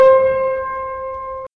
Part of a series of piano notes spanning one octave.
note, piano-note, piano-notes, piano, keyboard-note, c, notes, keyboard-notes, keyboard